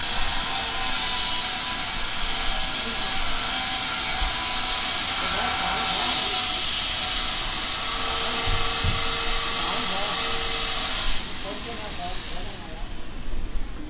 Recorded in Bangkok, Chiang Mai, KaPhangan, Thathon, Mae Salong ... with a microphone on minidisc
street, thailand, machines